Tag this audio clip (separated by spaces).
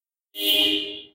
CellPhone field recording